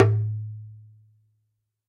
Doumbek mid
Doumbek One-Shot Sample